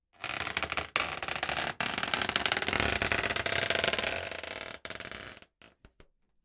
Balloon Creak Long Twist 5
Recorded as part of a collection of sounds created by manipulating a balloon.
Board, Twist, Rope, Close, Squeak